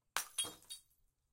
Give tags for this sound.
light; Bottle-Breaking; Bottle-smash

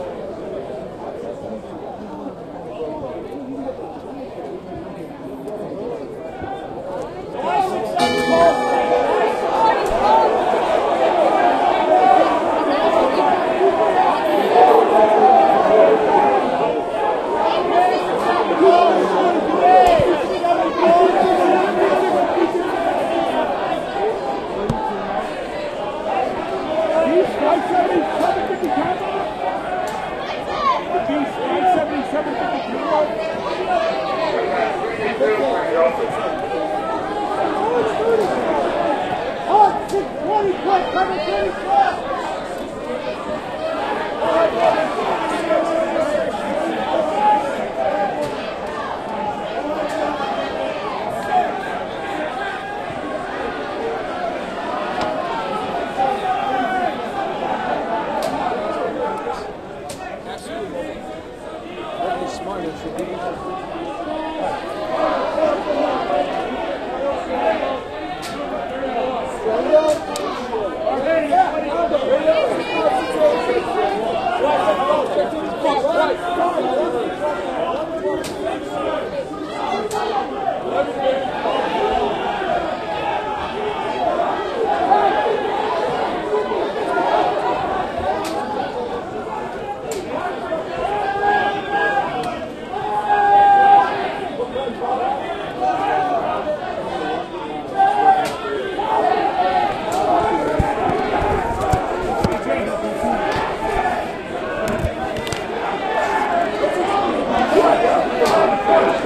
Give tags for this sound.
Pit; stock-exchange; opening; floor-trader; trading; options; trader; bell; outcry; yelling; futures; open-outcry; open

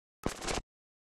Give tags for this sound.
percs; clap; percussion; percussive; hit; perc; sfx; one-shot; snaree

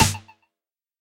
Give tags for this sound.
experimental; samples; kit; drum; idm; hits; techno; noise; sounds

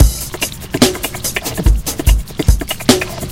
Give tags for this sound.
beat; beatbox; beat-box; breakbeat; drumloop; loop; break

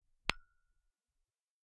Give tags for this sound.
ornament; short; dry; tap; glass

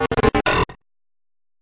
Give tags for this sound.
effect; soundeffects; gameaudio; sfx; sound-design; indiegame

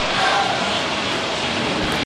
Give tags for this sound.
ambiance; arcade; ocean-city; field-recording; boardwalk; monophonic

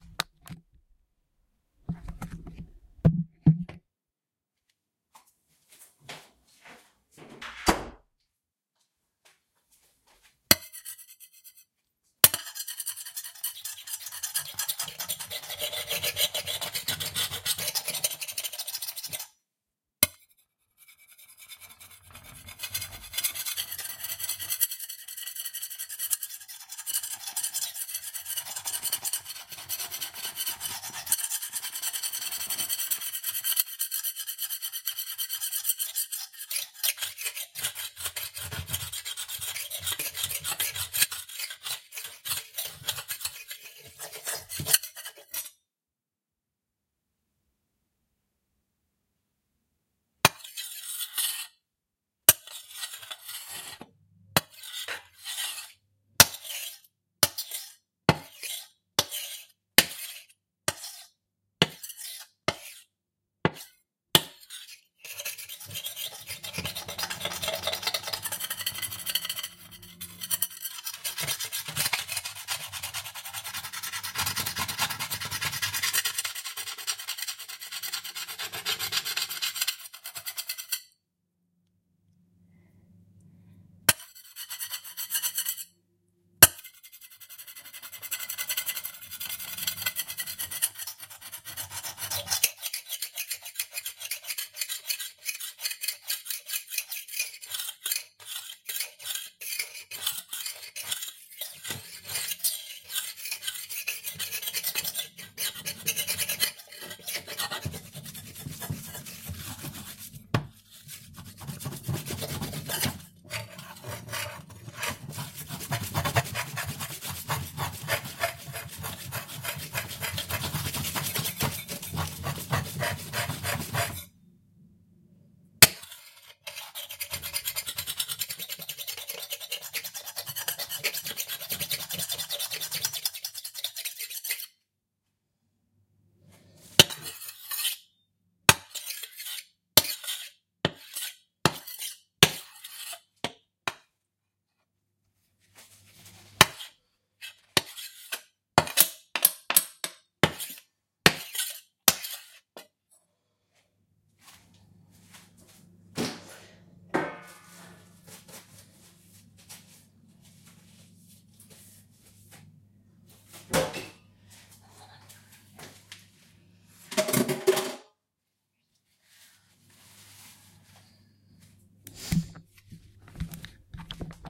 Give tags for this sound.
ceramic; steel

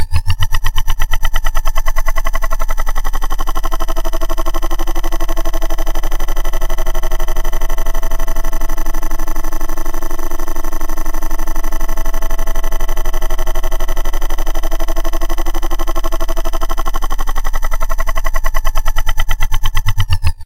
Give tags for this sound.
sci-fi; wings; insect

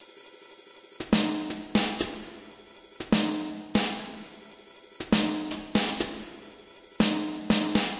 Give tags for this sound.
club; hihats; 120bpm

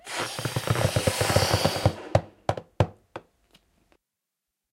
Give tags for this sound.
inflate; balloon; strain

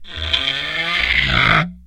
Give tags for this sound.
daxophone; friction; idiophone; instrument; wood

